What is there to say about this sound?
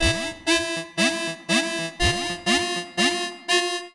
Live Wonk Synth 23
8bit, live, wobbler, session, synth, arcade